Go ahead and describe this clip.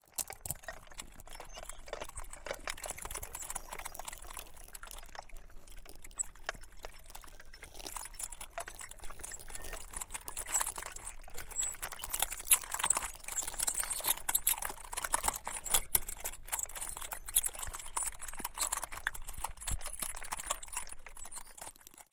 Critters creeping
Various layers of things scraping to create a visceral sound bed that evokes creepy crawly things. Created using various wire brushes slowly scraping against different surfaces.
animals, bugs, crawling, creature, creepy, cringe, disgusting, haunted, horror, insects, monster, scary, swarm, terror, uncomforable, visceral